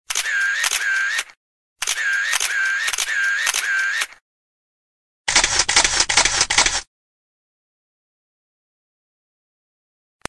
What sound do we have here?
camera shutter nikon dslr